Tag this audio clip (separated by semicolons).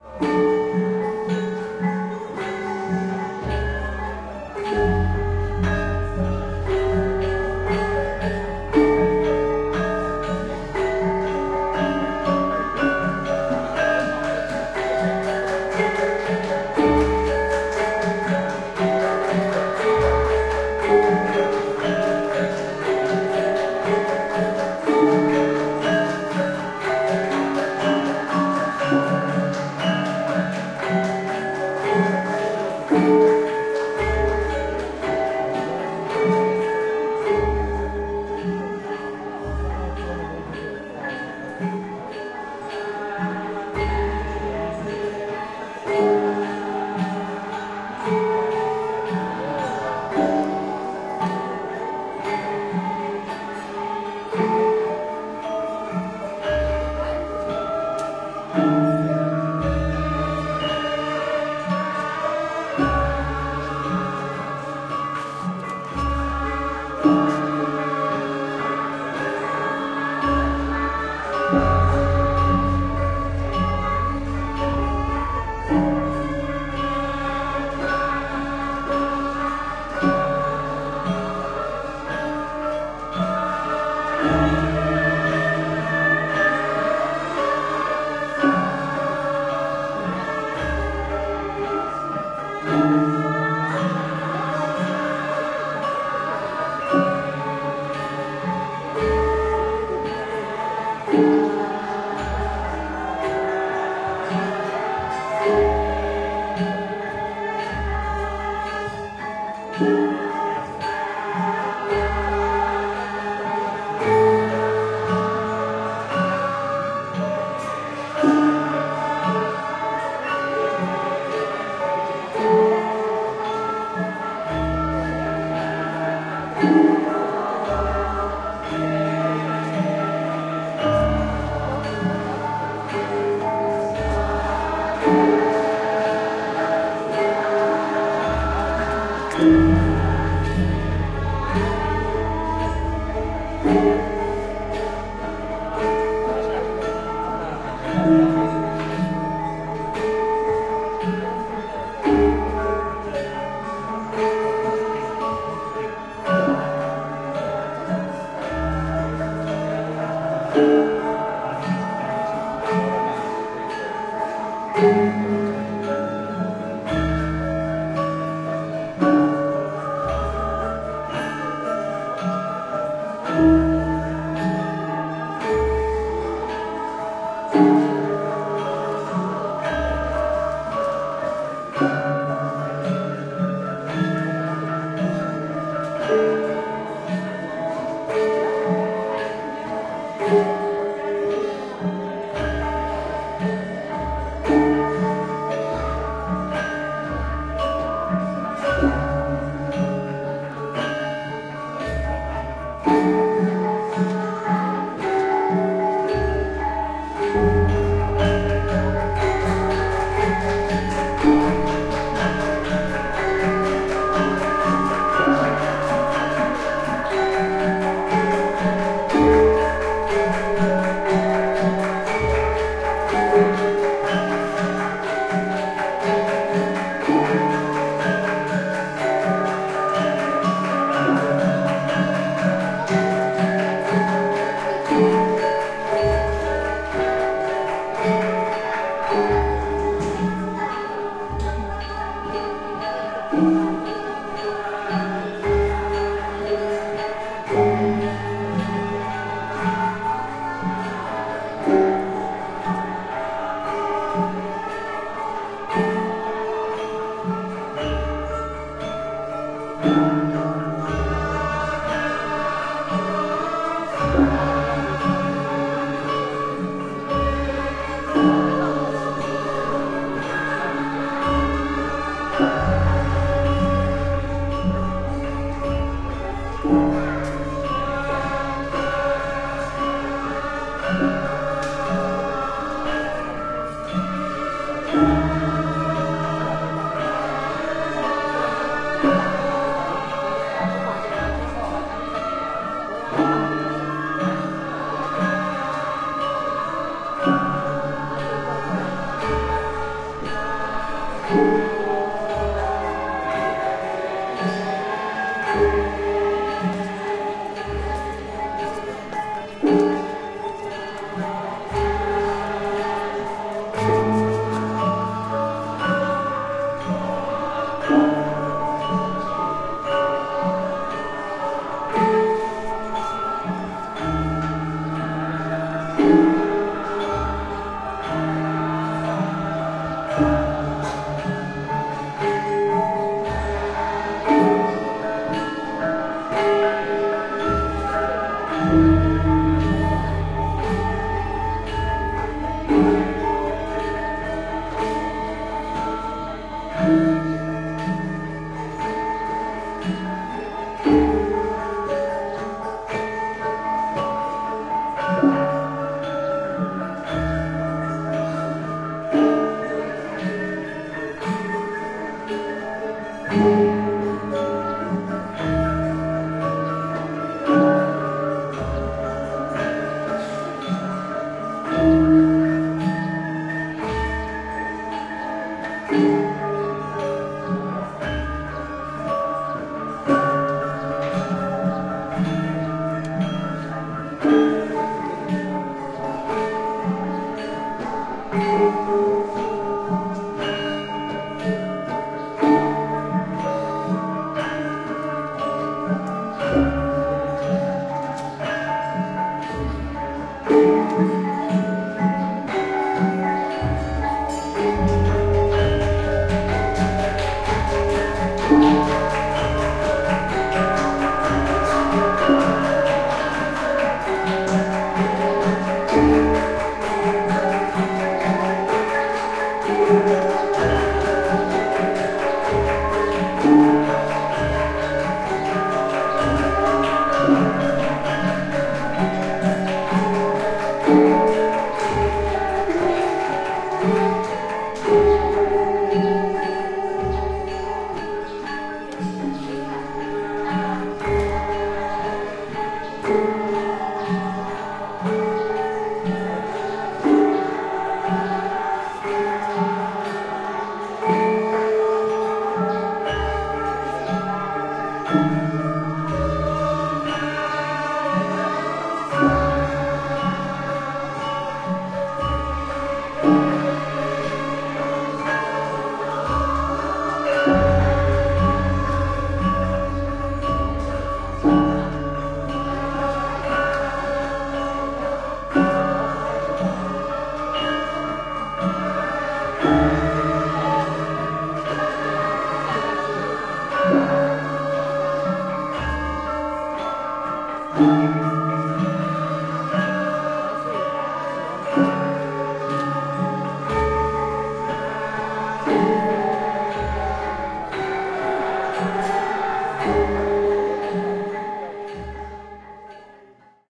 Kraton; xylophone; gender; vocal; Indonesia; swara; field-recording; peking; court; gamelan; metallophone; pendopo; Yogyakarta; saron; wayang; traditional; Java; Asia; Jogja; sultan; music; Sindhen; gong; karawitan; percussion; palace; boning; kendang